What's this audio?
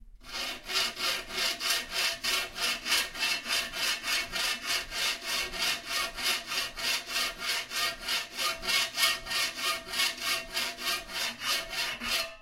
04-3 Metal Saw
CZ, Czech, metal-saw, Panska